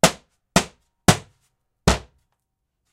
Nagra ARES BB+ & 2 Schoeps CMC 5U 2011.
percussion on wooden board, multi sound sharp and flat.
board, percussion, wooden